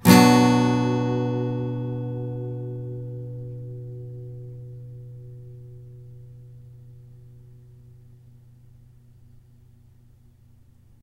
yamaha Bm
More chords recorded with Behringer B1 mic through UBBO2 in my noisy "dining room". File name indicates pitch and chord.
chord, minor, acoustic, guitar, yamaha, multisample